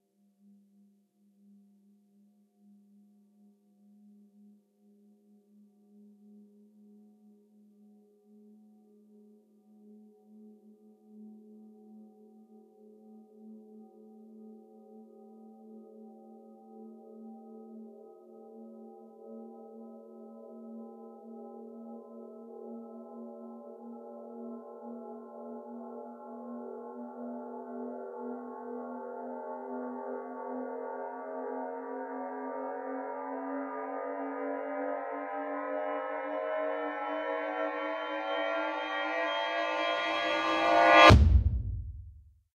Clang Cinematic Reversed With Deep Kick (Rising)
Gradually rising pitch version of Clang Cinematic Reversed With Deep Kick.
climactic, glissando, transition, cinematic